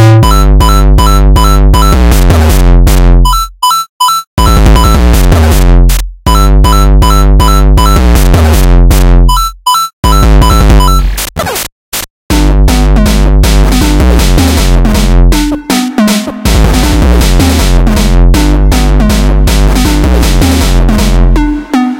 8-bit Gabber Piece 2
Piece of an 8-bit inspired Gabber track of mine. Ableton Live, with the free VST's: Toad and Peach, and more
rhythmic, techno, hakkuh, 8-bits